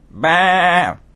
A man doing a vocal imitation of a sheep saying "baa!"